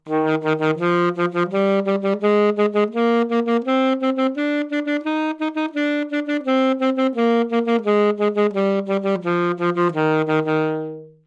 Sax Alto - D# Major
Part of the Good-sounds dataset of monophonic instrumental sounds.
instrument::sax_alto
note::D#
good-sounds-id::6628
mode::major